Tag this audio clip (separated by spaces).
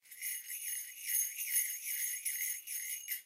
orchestral percussion cymbal bell chime ding finger-cymbals